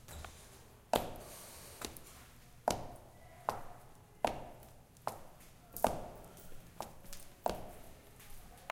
Sound recording in and around the house of K.
home, shoes